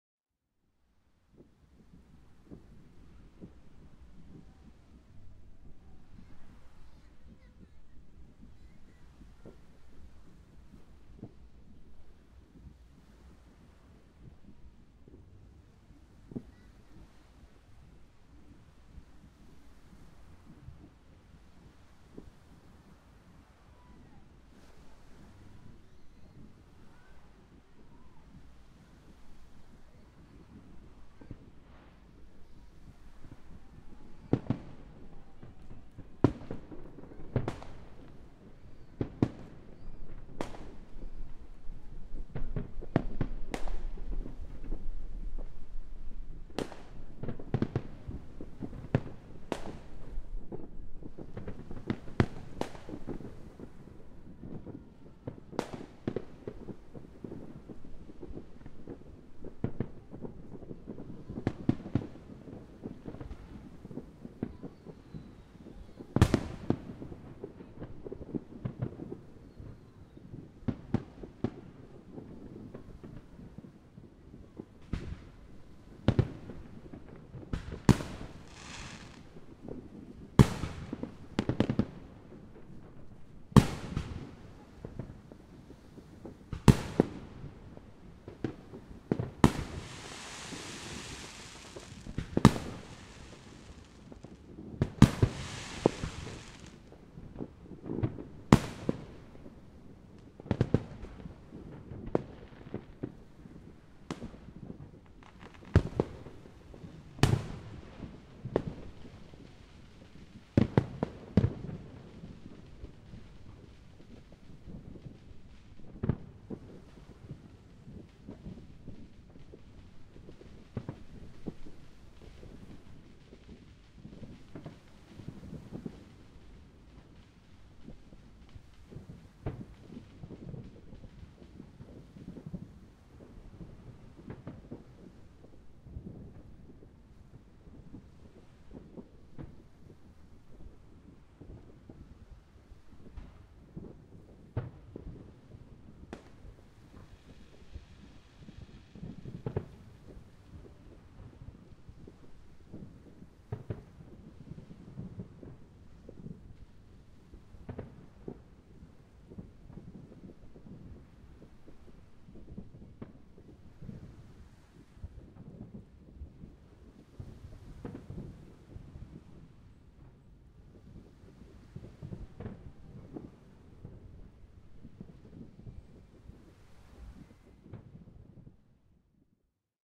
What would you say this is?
2023 New Year Celebration on Pattaya Beach with Loud Fireworks, recorded with Audio Technica USB audio interface and de-clipped with iZotope RX 8.
explosion, new-year, boom, fireworks, beach